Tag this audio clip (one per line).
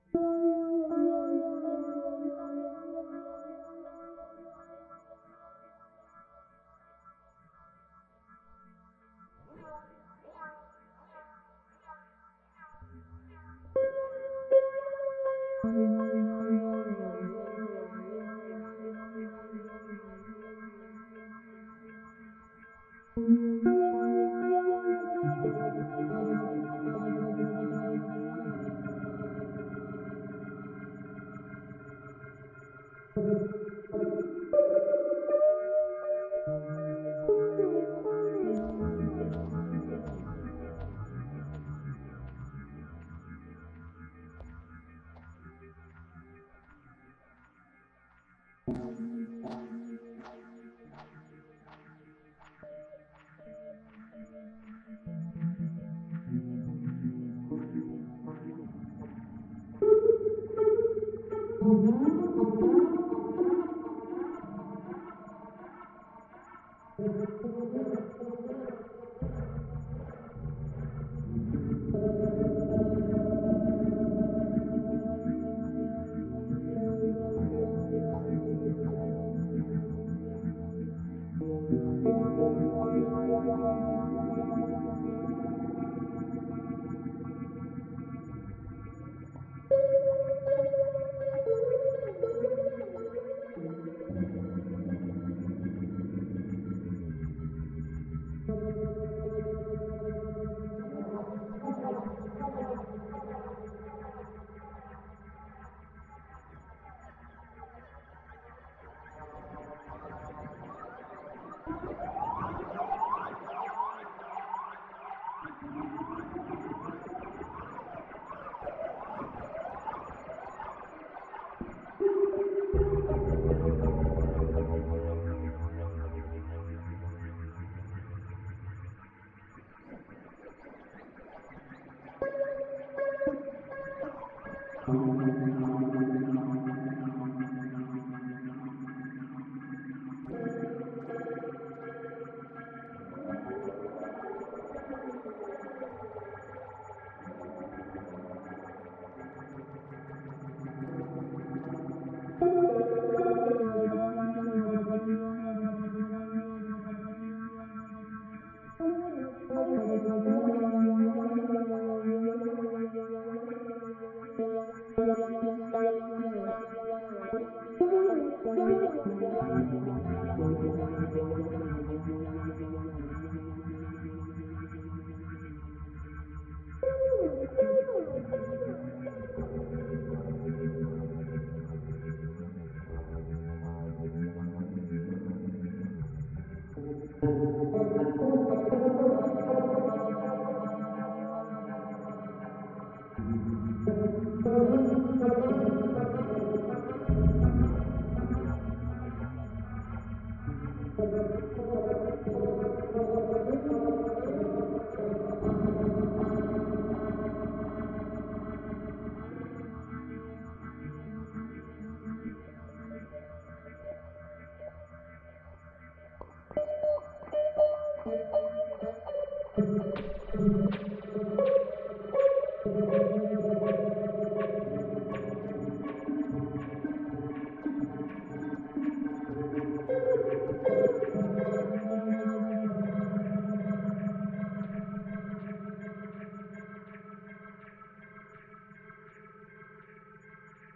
vst
fx
focusrite-2i2
computer
atmosphere
electric-guitar
guitar-rig
night
NI